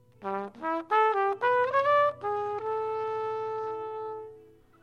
This sample was made by friend of mine, trumpet player Andrej, in one of our session.